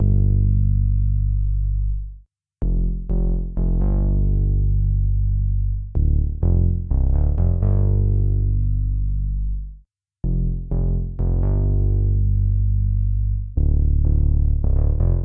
deep bassline DRY
short little bassline with some good tone on it, I forget what the progression is but a tuner probably can help you there
round, sub, rubber, bassline, wide, bass, juicy, bouncy, synth, low, rubbery, deep, loop